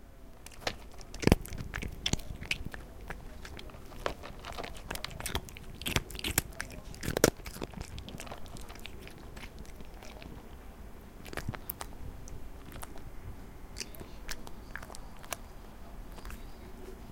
Cat eating
animals, eat, eating